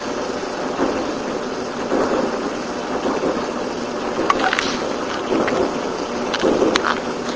This is a Drying machine, a dryer.
dryer, spin